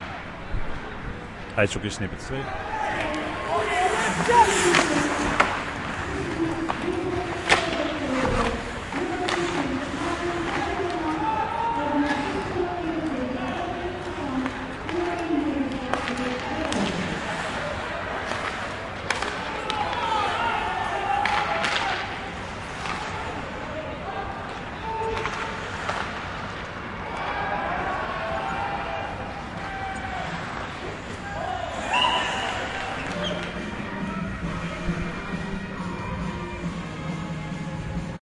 05-IceHockey-effects
Recorded match in the Uithof, the hague, icehockey match